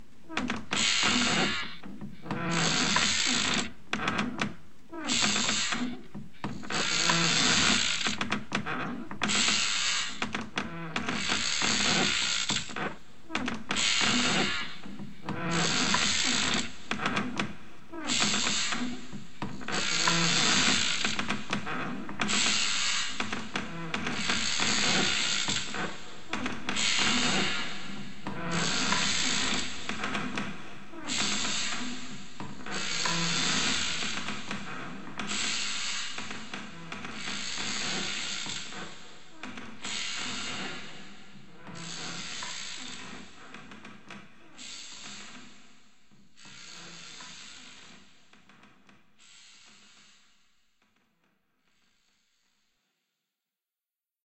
rocking chair final mono
A slow rocking chair who's frightening